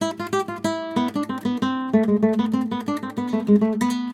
Flamenco loop 23
Nylon string guitar loop. Semi-flamenco style.
plucked, string